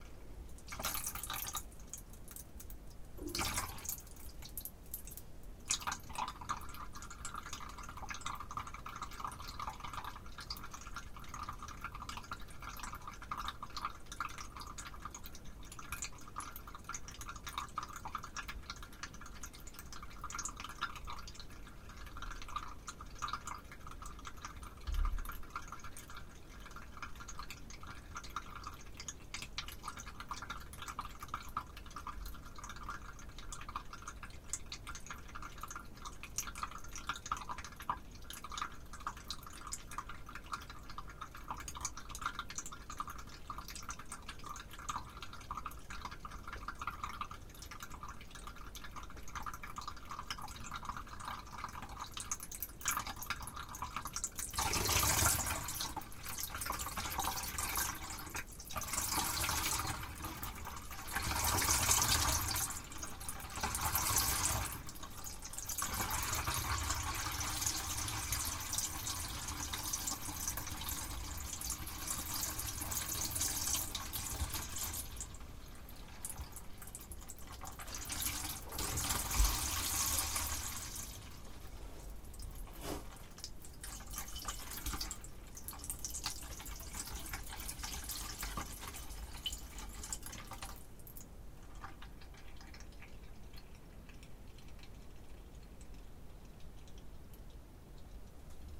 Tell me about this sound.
Water dripping, captured from under the sink. I filled the sink while holding a sponge on the drain, then let the water slowly escape down the pipe to record the texture. I do not remember if the water was warm or cold, please don't ask.